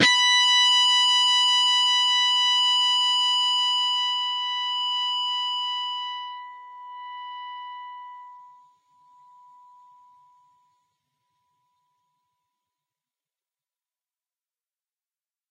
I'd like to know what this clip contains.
Dist sng B 2nd str 5th frt Hrm
B (2nd) string, 5th fret harmonic.